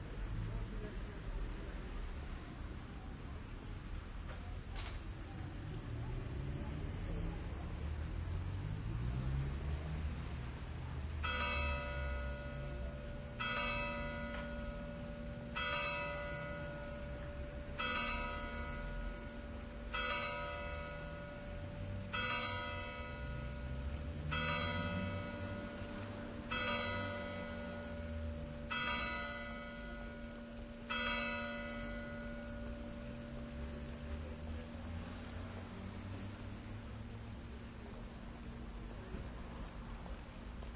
ajaccio 10pm
Sitting in the central square in Ajaccio listening to the 10pm bells ring. There is a little bit of street ambiance before and after the bells ring.
Recorded with The Sound Professional binaural mics into Zoom H4.
ambient, bells, binaural, field-recording, ring